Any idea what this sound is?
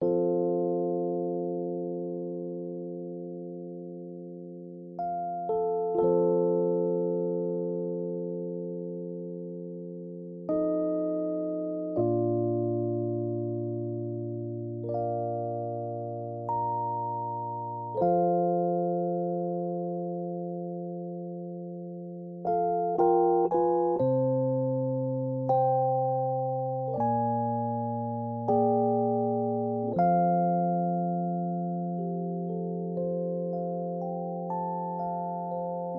Song5 RHODES Fa 3:4 120bpms
Rhodes, 80, beat, HearHear, Chord, Fa, loop, rythm, blues, bpm